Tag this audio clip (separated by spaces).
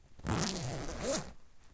aip09 unzip zipper zip